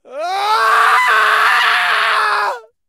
Sad cry 10
Just so sad about something.
Recorded with Zoom H4n
acting, agony, anguish, clamor, cries, cry, distress, emotional, grief, heartache, heartbreak, howling, human, loud, male, pain, sadness, scream, screech, shout, sorrow, squall, squawk, ululate, vocal, voice, wailing, weep, yell